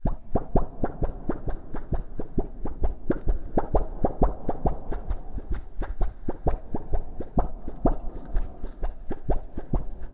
Wobbling a thin plate. Recorded with a ZOMM H1.